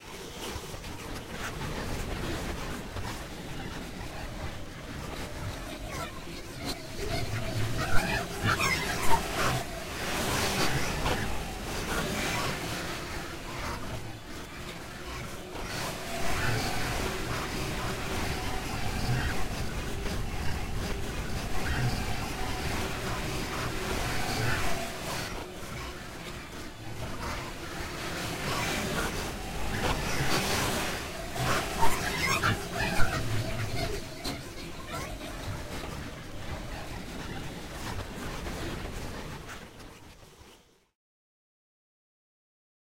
Processed vocals in a sea of...